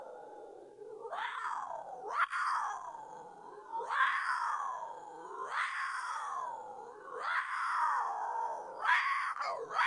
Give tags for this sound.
CarolinaPanther; animals; panther; dab; Cam1; 3naudio17

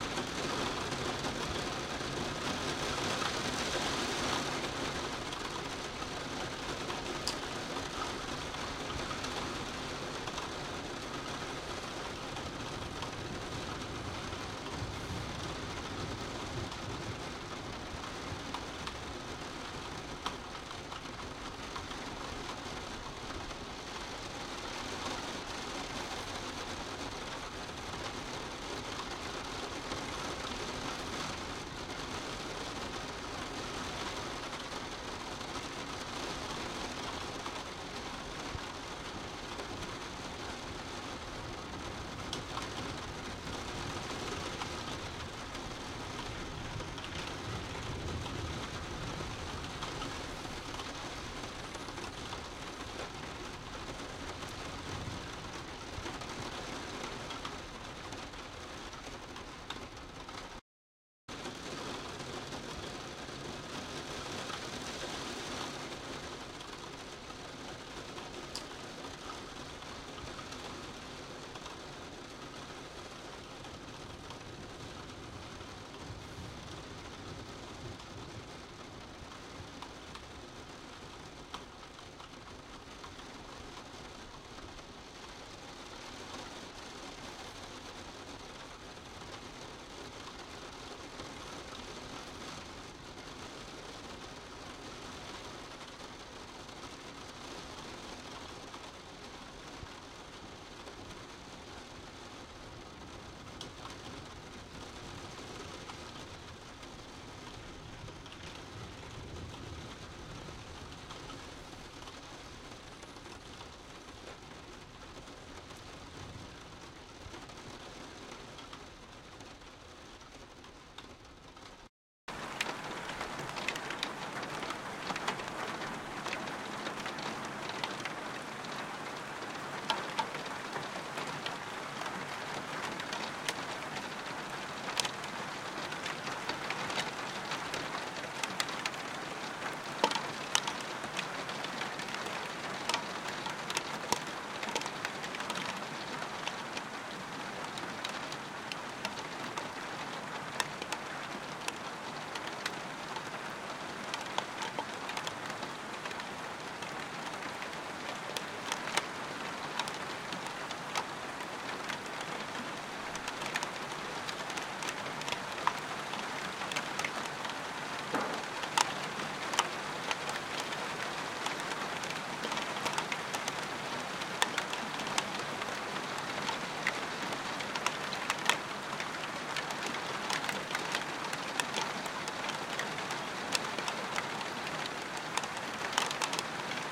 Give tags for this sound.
Rain; Raining

